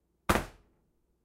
Slamming object on table
Slamming an object, in this case a pencil, on a hard table top.
slam, slamming